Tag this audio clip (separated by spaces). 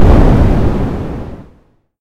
bang detonation bomb explosion boom explode